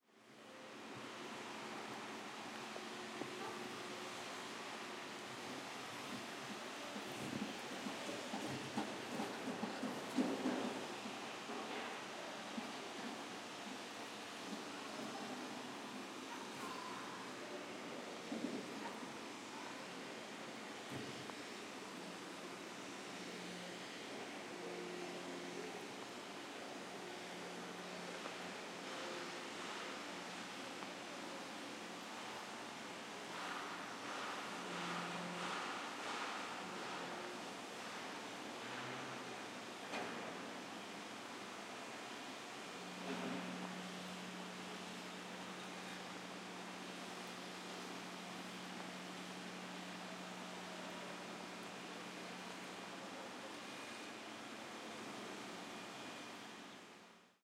Chantier-Amb
A general ambiance in building site with recorded on DAT (Tascam DAP-1) with a Rode NT4 by G de Courtivron.
building, field, recording, site, work